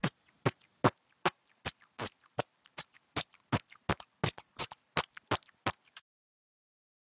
Sound I used in my Gifleman cartoon to simulate the sound of a walking poop